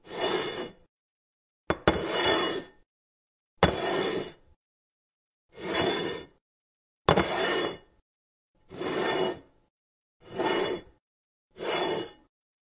Plate Sliding on Counter
A selection of a ceramic plate being slid on a hard counter surface.